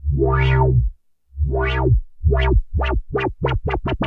A thick, rich, chorused bass tone with accelerating filter cutoff modulation from an original analog Korg Polysix synth.